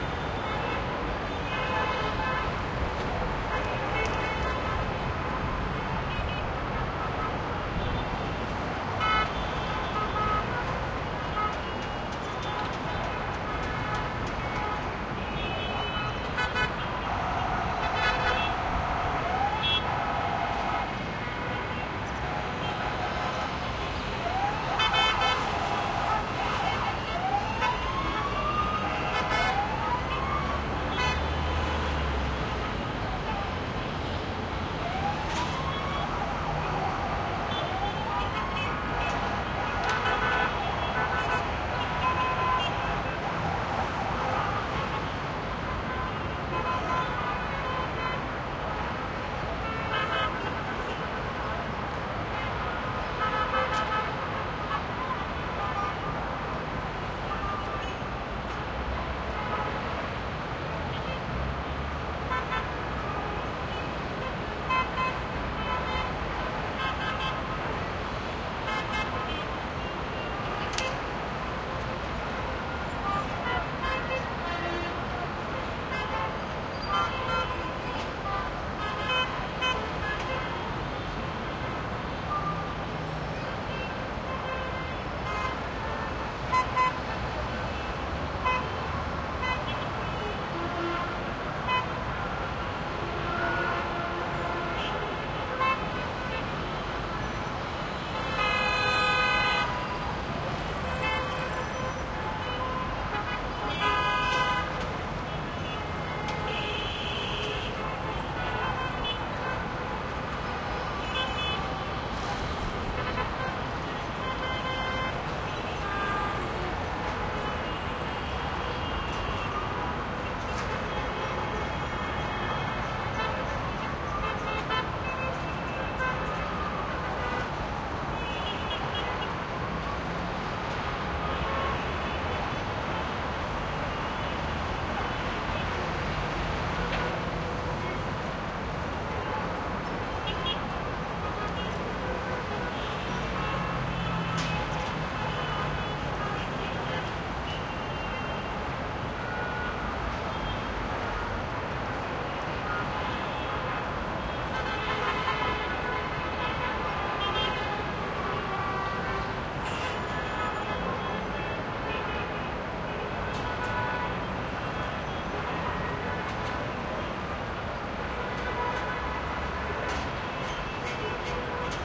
skyline Middle East distant traffic horn honks and city haze11 closer busy dense annoyed horns and siren woops start Gaza 2016
city, distant, East, haze, honks, horn, Middle, skyline, traffic